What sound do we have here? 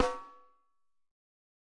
A Snare drum sample for Grindcore music. Made with BFD2 Piccolo samples, and modified with waves L2 maximizer.
Drum, Goregrind, Grindcore, Snare